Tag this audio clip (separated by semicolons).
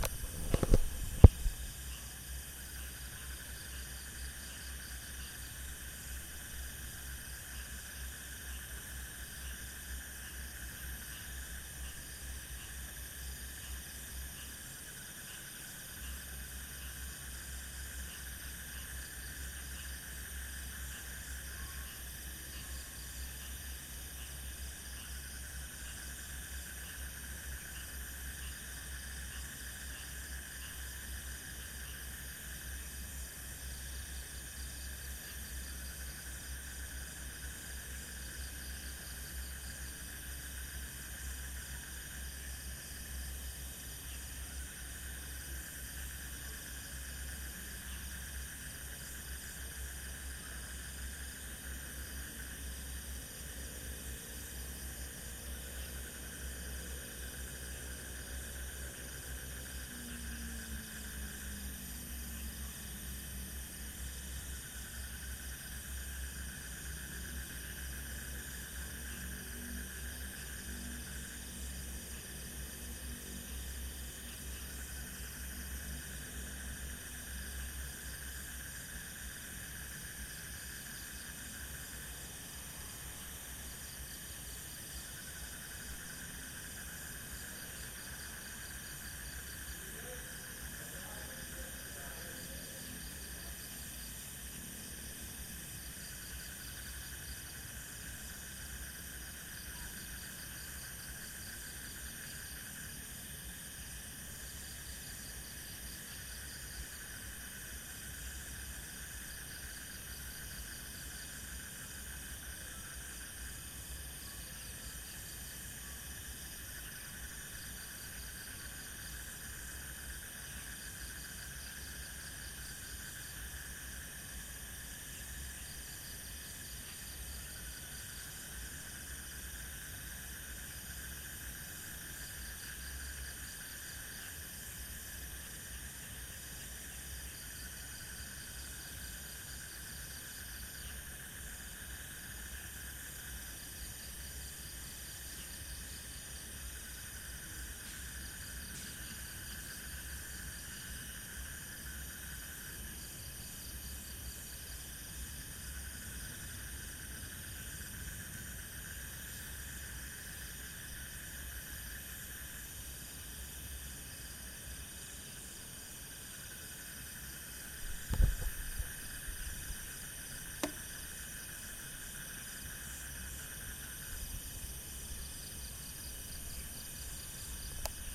frogs; bali; fieldrecording; insects; crickets